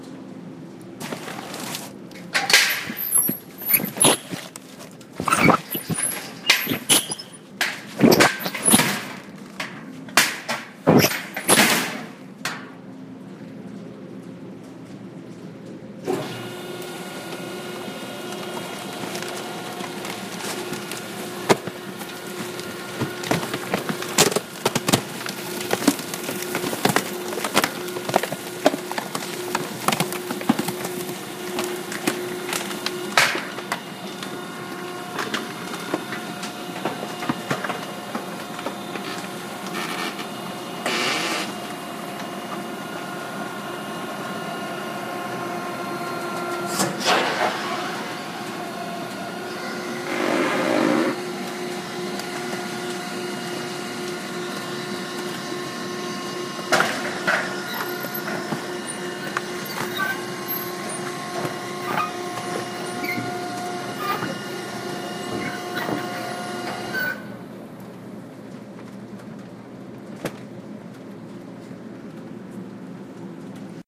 A compactor crunching boxes